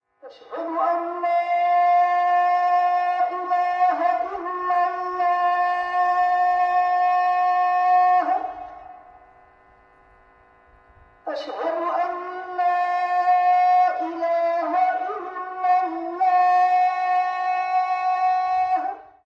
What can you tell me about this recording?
S033 Iman call to prayer Mono
Sound of an Iman call to prayer
Call-to-prayer, Bazaar, Muslim